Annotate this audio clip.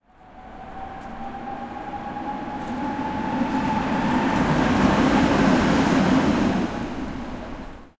Noise of trams in the city.